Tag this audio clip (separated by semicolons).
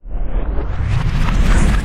bullet; game; motion; slowdown; speed; time; up; video